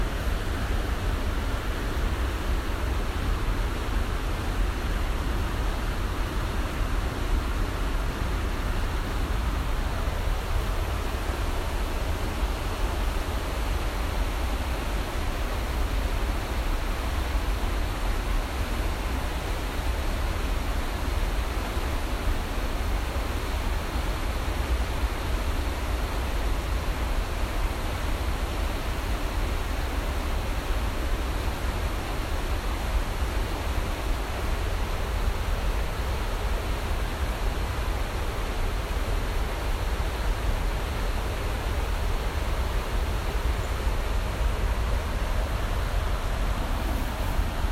Iguassú River
This recording is made not of the falls but of the water right before the falls, so you can hear the roar of the falls in the background, but the recording is dominated by the sound of the river approaching them. I made the recording on the Brazilian side of the falls, if that makes any difference to you.
falls, flow, foz-do-iguacu, iguacu, iguassu, nature, river, riverside, water